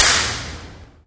garage ceiling
Up close to the ceiling for some slapback and reverb. Recorded with cap gun / party popper and DS-40. Most have at least 2 versions, one with noise reduction in Cool Edit and one without. Some are edited and processed for flavor as well. Most need the bass rolled off in the lower frequencies if you are using SIR.
impulse, convolution